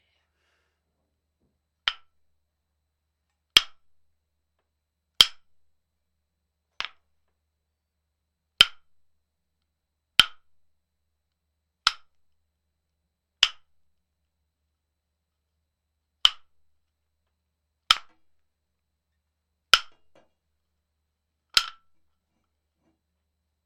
nunchucks wood hit fight punch